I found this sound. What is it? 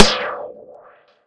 layered, filtered, timestretched, percussion.
experimental, percussion, fx, weird, space